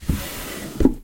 Wood drawer O
close, closing, drawer, open, opening, wooden